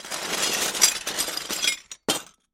Dish Rustling 2
Recorded by myself and students at California State University, Chico for an electro-acoustic composition project of mine. Apogee Duet + Sennheiser K6 (shotgun capsule).
break, ceramic, china, cup, Dish, percussion, porcelain, smash